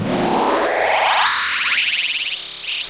kidneyglidedownechoflangedistrev3dflangeup
Processed sound from phone sample pack edited with Cool Edit 96. Stretch effect applied then gliding pitchshift, echo, flanger and distortion reversed. Added 3D chamber echo and more flanger and another glide.
distortion, echo, flanger, male, mangled, processed, reverse, scream, stretch, voice